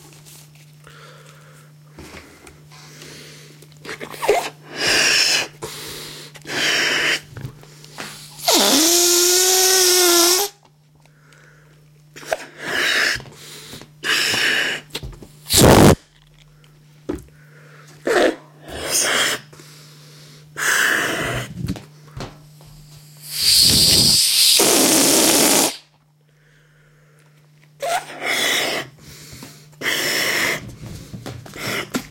Inflating a balloon, and then letting the air out several times. It sometimes sounds like a whoopee cushion.
Recorded with a Canon GL-2 internal microphone.
balloon, unprocessed, toys, inflatable, inflate, toy, request